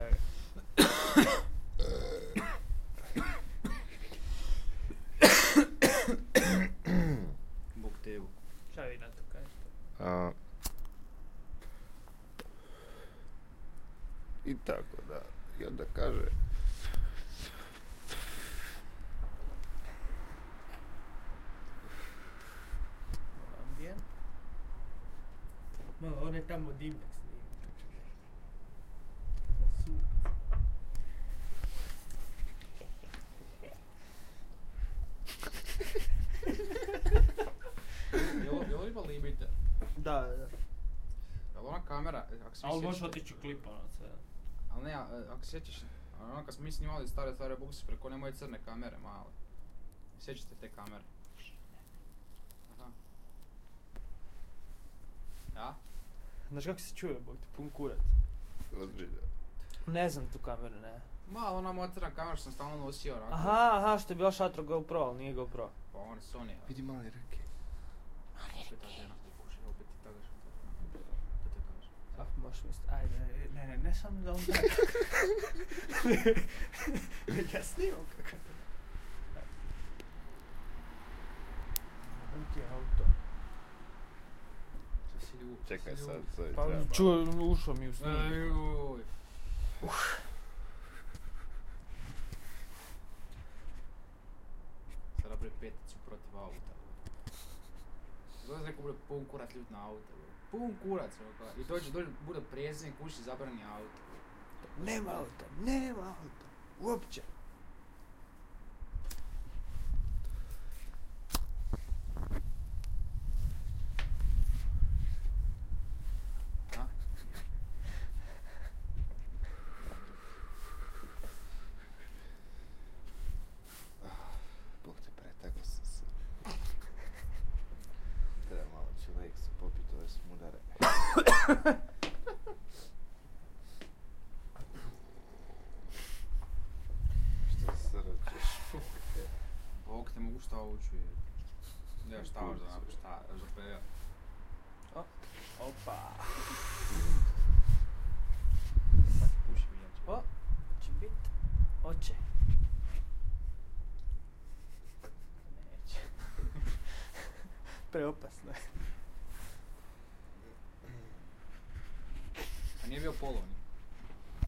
smoking on the balcony
bacit-tage-na-balkonu, balcony, field-recording, smoothie, taganje